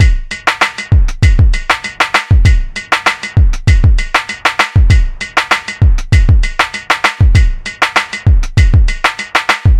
abstract-electrofunkbreakbeats 098bpm-handboy
this pack contain some electrofunk breakbeats sequenced with various drum machines, further processing in editor, tempo (labeled with the file-name) range from 70 to 178 bpm, (acidized wave files)
hiphop, soundesign, heavy, experiment, chill, club, percussion, hard, distorsion, abstract, programmed, processed, dj, downbeat, slow, electro, dontempo, reverb, filter